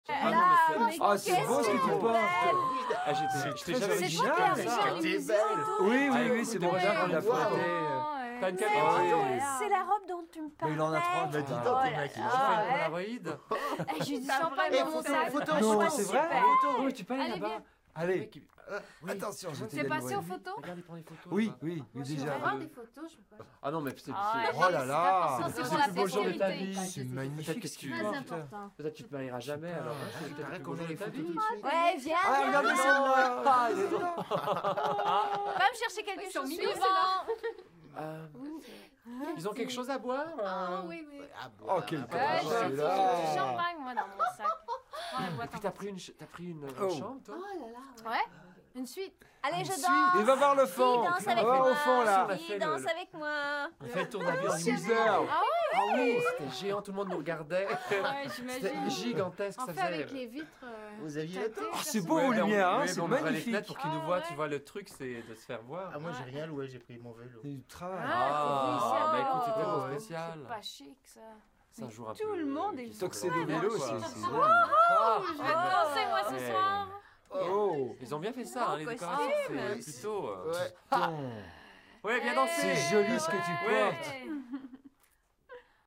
Interior vocal (French) ambiences: party on!
interior, party, localization-assets, vocal-ambiences, walla